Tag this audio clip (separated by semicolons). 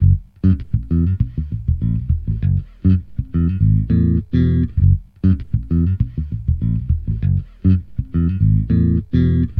Compressor; Fretless; Funk-Bass; Loop-Bass